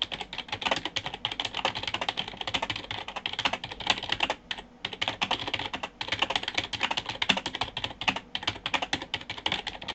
typing sound
This is a sound of me typing really fast, This sound plays seamlessly.
keyboard, typing